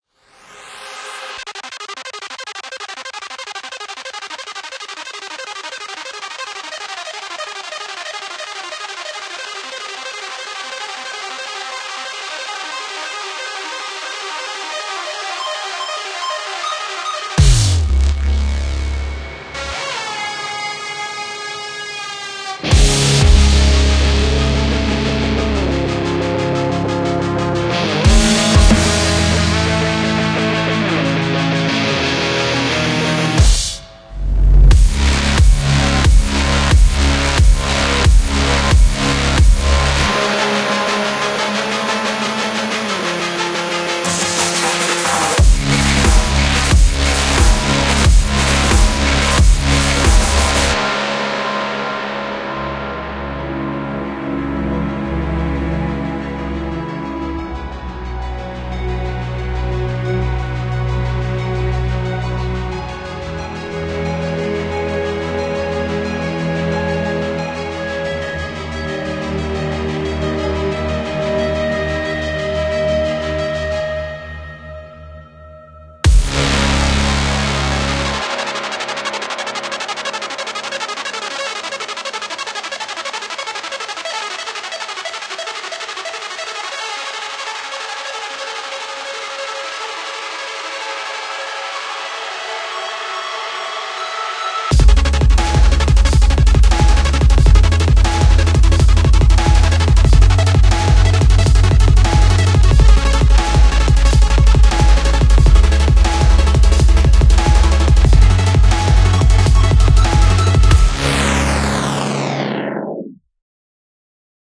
Cyberpunk trailer music part of a series of concept track series called "bad sector"